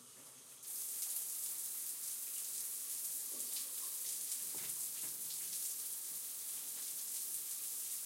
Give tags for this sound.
bathroom water shower

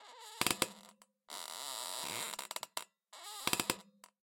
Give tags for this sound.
chair squeaky creaky squeak creak

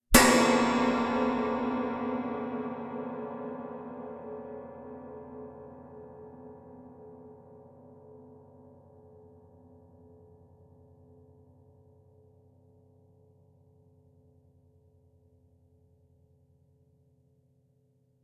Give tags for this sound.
All AutoHarp Chord Chromatic Classic Creepy Hit Horror Instrument Musical Notes Scale Scare Scary SFX Strike Strings Suspense

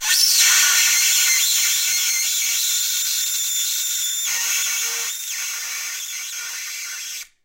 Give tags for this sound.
polystyrene howl screech bow harsh-noise noise high-frequency plastic styrofoam harsh synthetic bowed polymer

